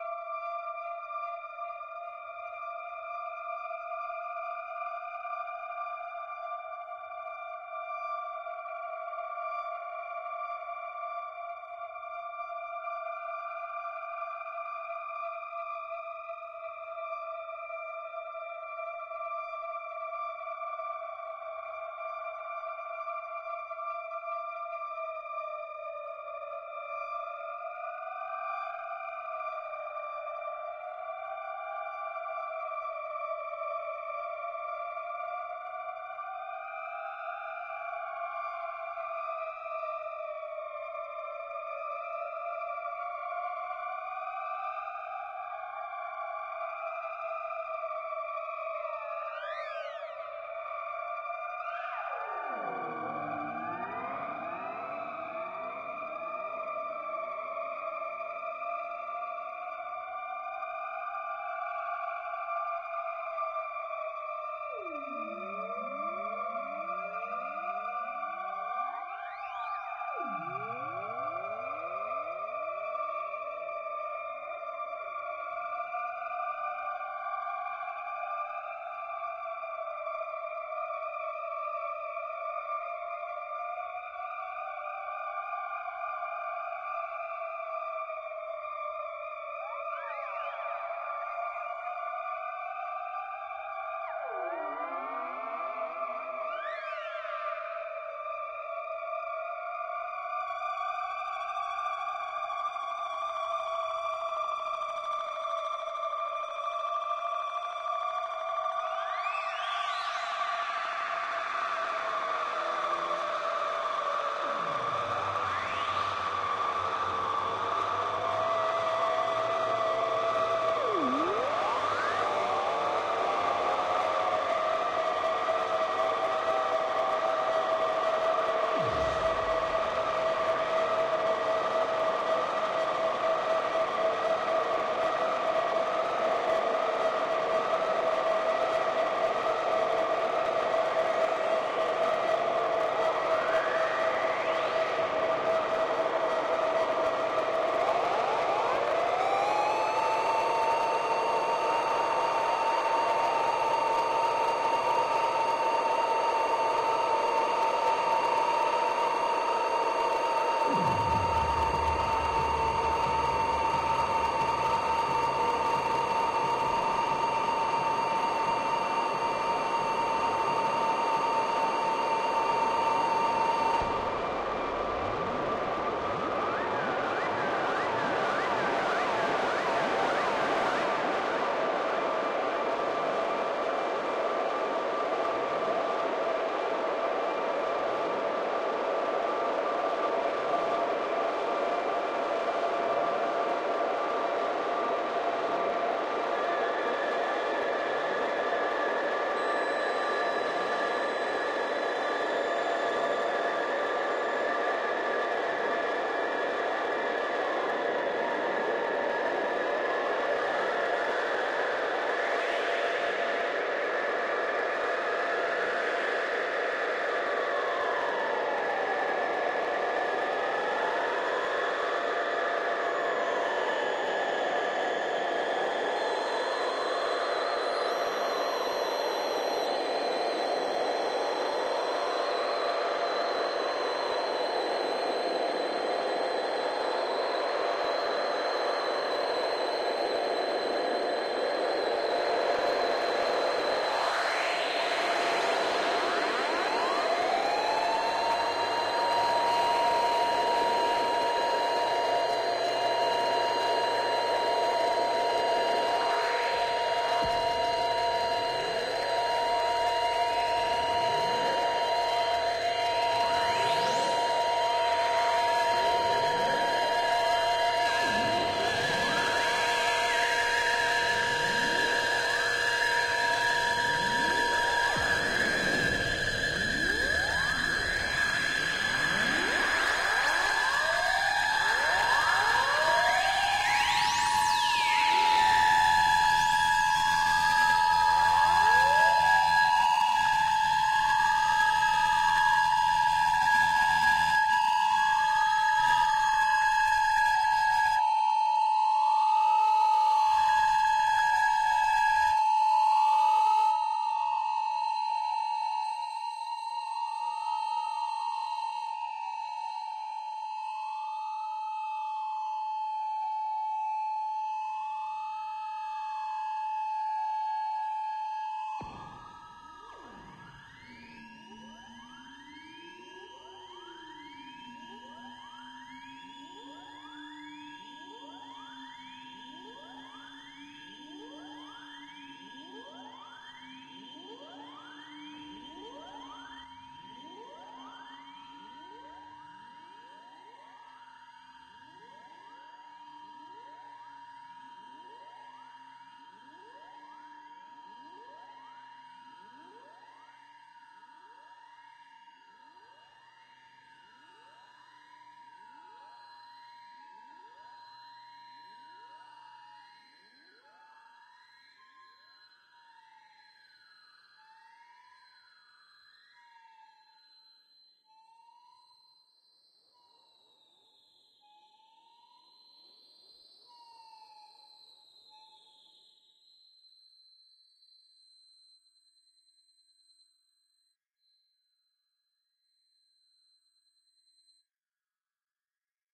vintage scifi drone
Made with Ableton Echo, and... I forgot.
lofi, spaceship, 70s, atmosphere, movie, scifi, drone, ambient, vintage, pad, space, film, echo, mood, 60s, synthesizer, sounddesign, cinematic, reverb, electronic, synth, delay